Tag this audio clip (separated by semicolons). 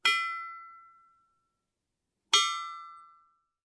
hit; metal; ring